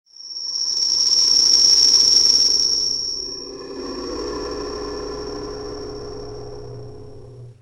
microsound+souffle
Microsounds and wind noise made by a crossed synthesis beetween a cloud of sines and itself.
noise, granulation, microsounds